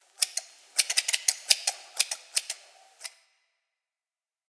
Short quick snips with metal scissors.
Recordists Peter Brucker / recorded 4/15/2018 / condenser microphone / scissors
metal, office, scissors, short, supplies